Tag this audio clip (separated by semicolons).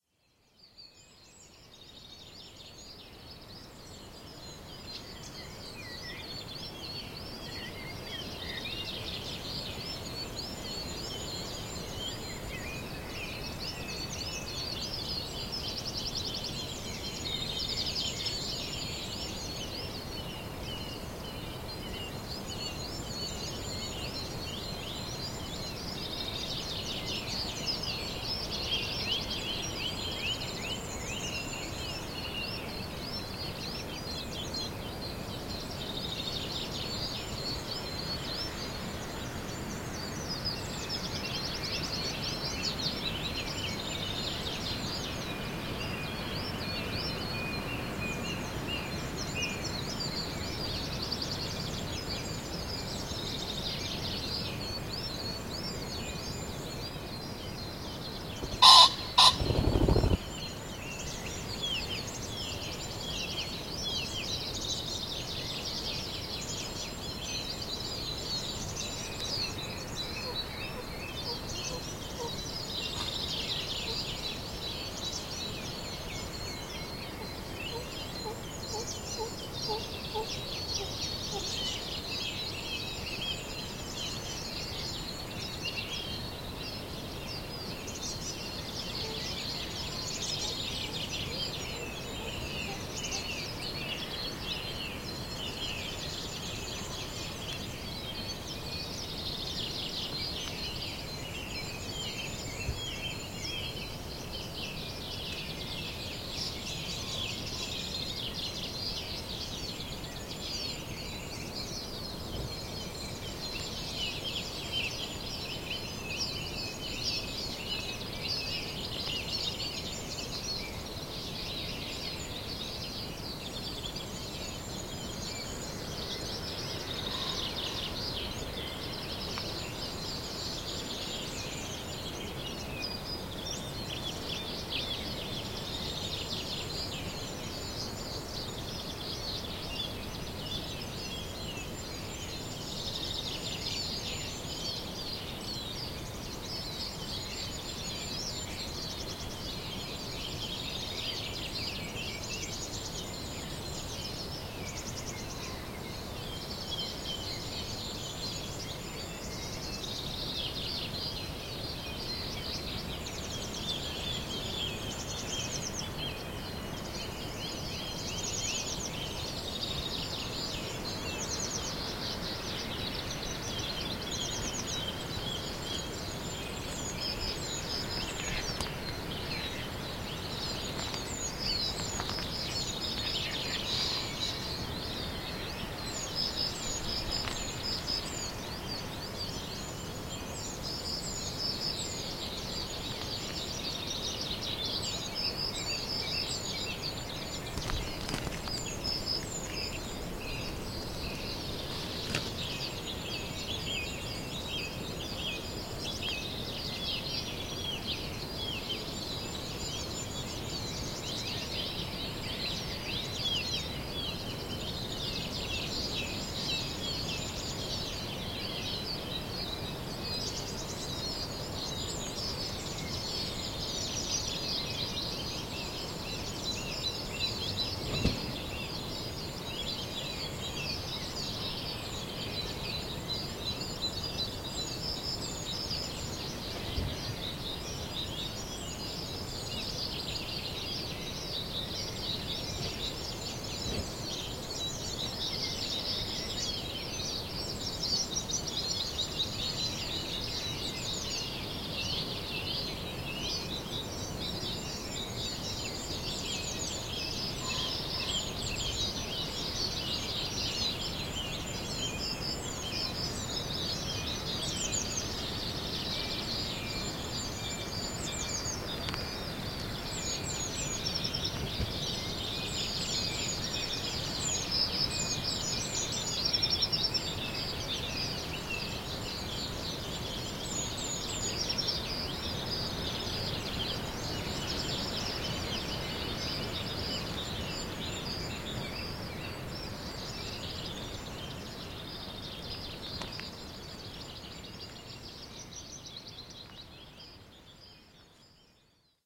ambient; birds; bird-song; field-recording; pheasant